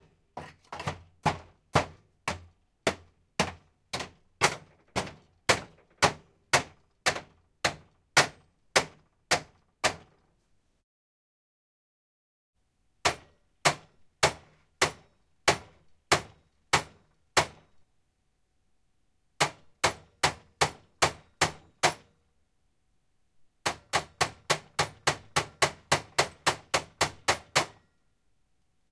Rhythmic Hammering on a metallic tool box with a scabbling pick (hammer).
Four different styles - first mixed with some scratching sounds, then hammering in three rates of speed.
Recorded on Zoom H2.